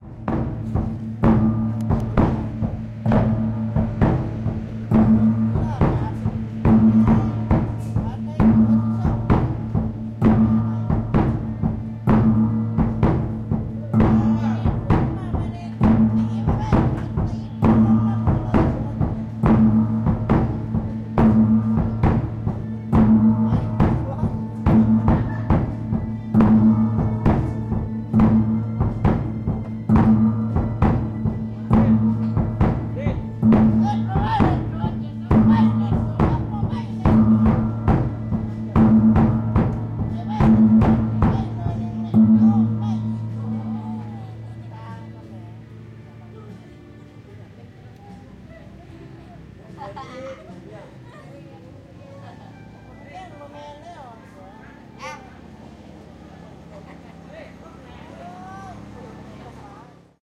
Drums and gon in a buddhist monastery
Percussion "Loop" with drum and gong in a buddhist monastery in Lao. Some people talk in the background
gong, beat, loop, rhythm, religion, monastery, drum